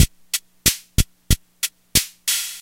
Rock Drum Loop extracted from the Yamaha PS-20 Keyboard. If I'm not mistaken, all drum loops are analog on this machine